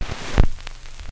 record end loop
Record noise from a very old, warped and scratched up voodoo record from early last century digitized with Ion USB turntable and Wavoasaur.